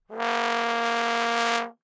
One-shot from Versilian Studios Chamber Orchestra 2: Community Edition sampling project.
Instrument family: Brass
Instrument: OldTrombone
Articulation: buzz
Note: A#2
Midi note: 47
Room type: Band Rehearsal Space
Microphone: 2x SM-57 spaced pair